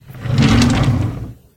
Drawer-Wooden-Empty-Open-01
This sound was captured from a small bed side dresser. I emptied the drawer before recording to get a more resonant sound. When it was full of socks it had a very dead and quiet sound that would be relatively easy to imitate through some clever EQing.
Wooden, Drawer, Open, Wood